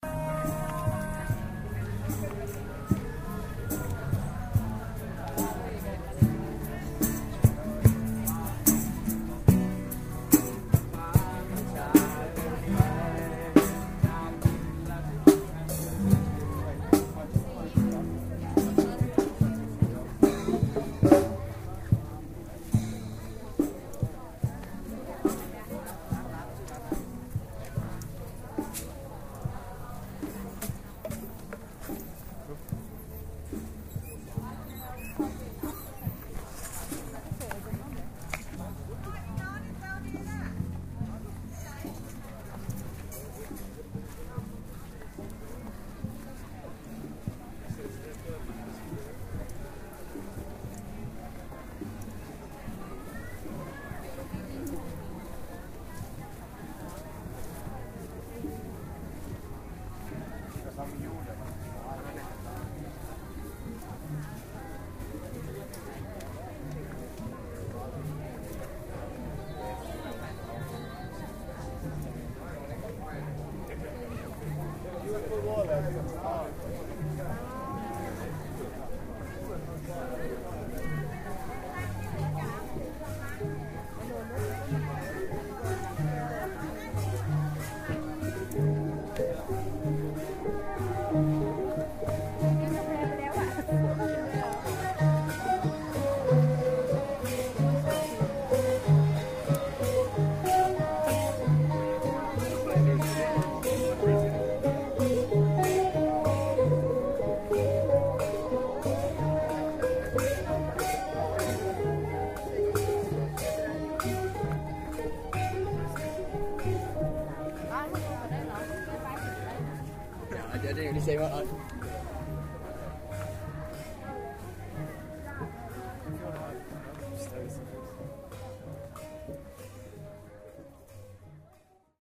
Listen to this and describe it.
walking market chiang mai
Chiang-Mai's Sunday street market